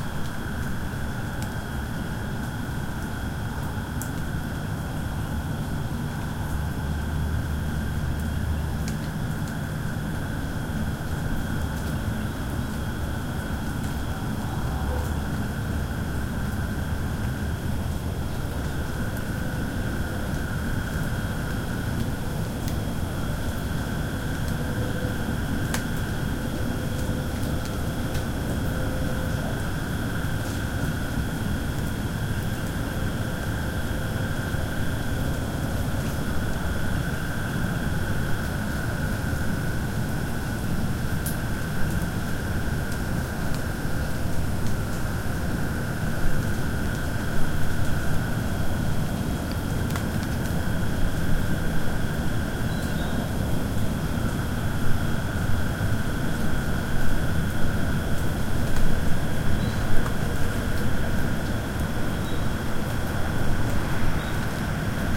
ambience in my driveway 07182013 2
Ambience recorded in my driveway at night after heavy rains.
ambience, cars, frogs, insects, night